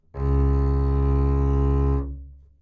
Double Bass - C#2
Part of the Good-sounds dataset of monophonic instrumental sounds.
instrument::double bass
note::C#
octave::2
midi note::37
good-sounds-id::8642